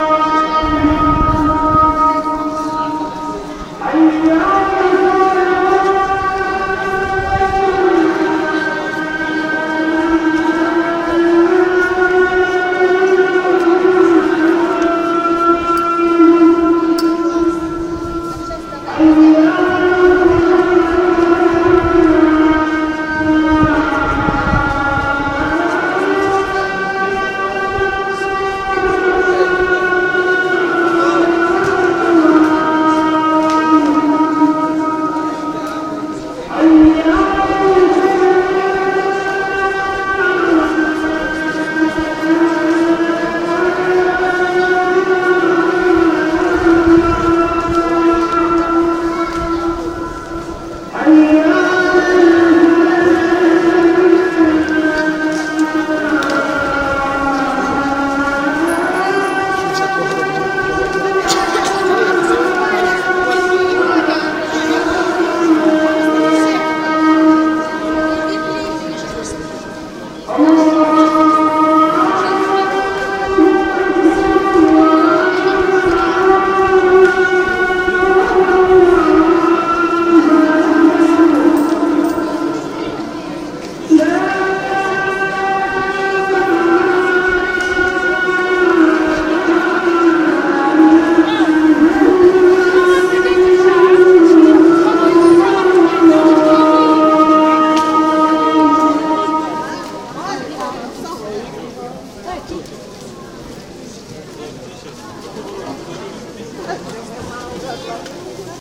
Israel sanctum sepulchrum adhan
Azan (Muslim call to prayer) is heard in the square in front of the Holy Sepulcher in the Old City of Jerusalem.
Recorded: 17-06-2013.
Format: Mono.
Device: Galaxy Nexus
Posted with permission
Denis-Nelubin, Holy-Sepulcher, muslim, Israel, azan, Jerusalem